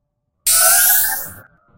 When you charge your attack or when your do a special in a fighting game.